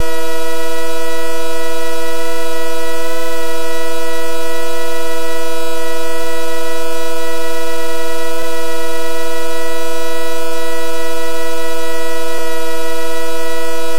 3 Osc Detune Strings
Sample i made using the Monotron.